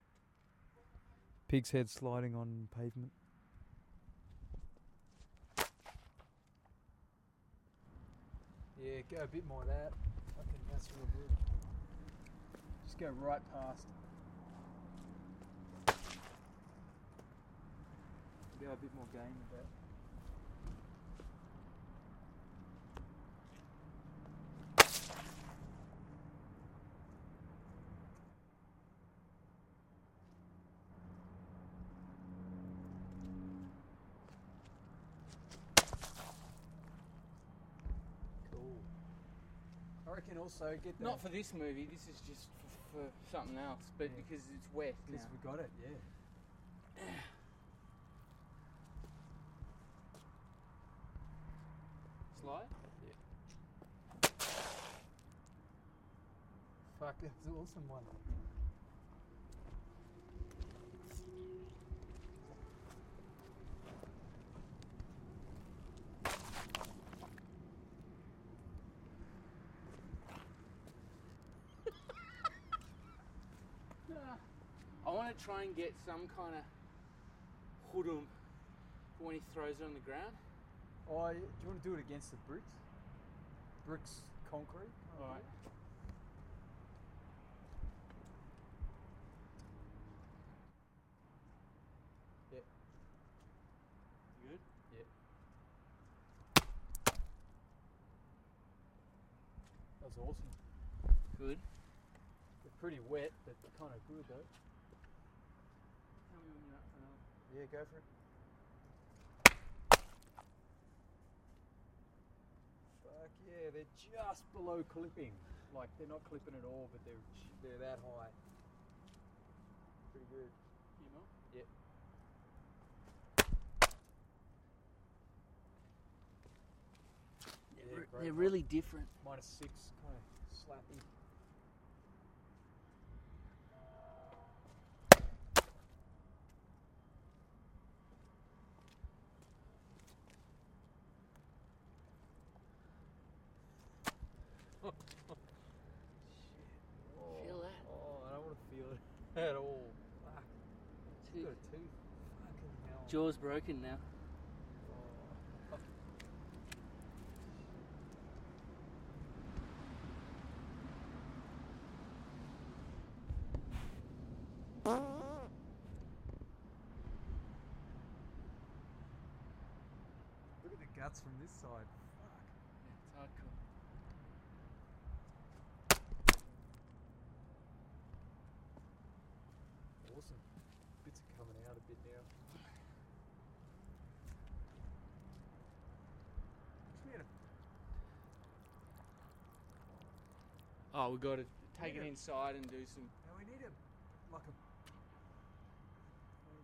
pig head slides wet
field-recording, head, pig, road, skimming, wet